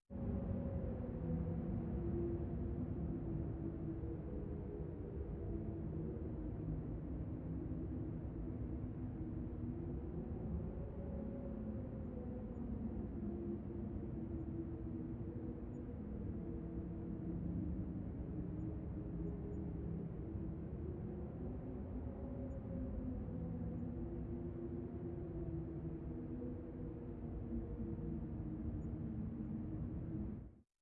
static environment that gives the feeling of a horror tunnel
a, ambience, atmosphere, dark, drone, environment, feeling, gives, horror, sci-fi, static, tunnel